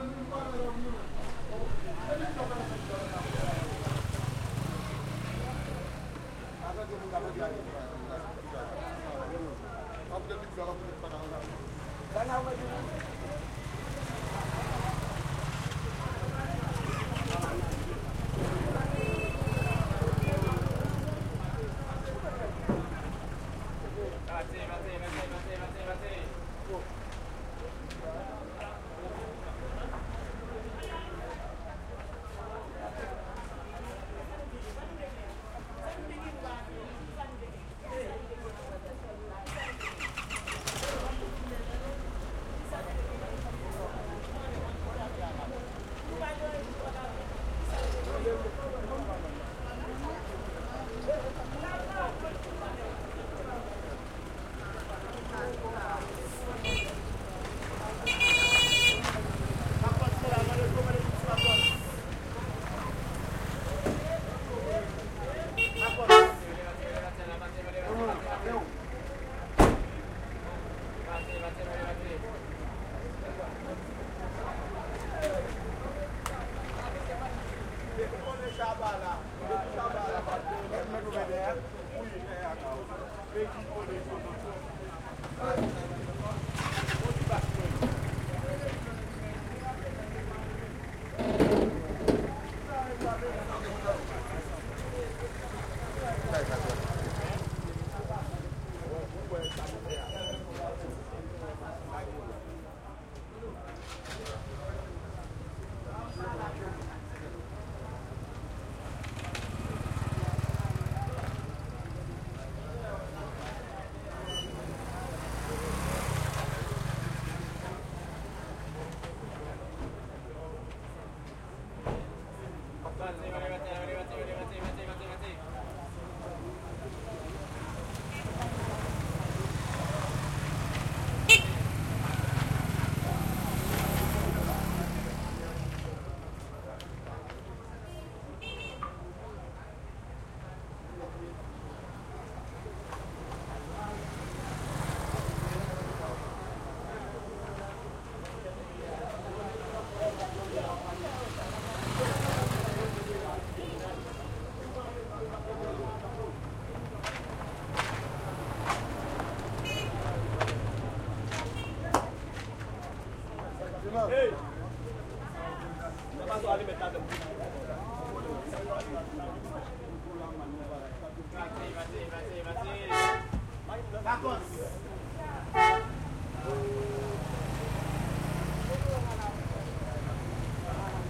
bus station street Haiti light crowd ext motorcycles pass2
bus station street Haiti light crowd ext motorcycles pass
bus, crowd, Haiti, light, station, street